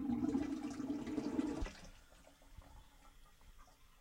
Sound of water that splashes in toilet .